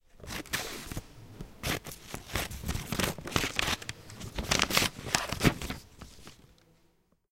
In this sound we tried to record a scissors cutting some paper. We can distinguish both the paper being wrinkled and the cuts the scissors make. It was recorded with an Edirol R-09 HR portable recorder and the mic was really close to the scissors. The recording was made inside the upf poblenou library.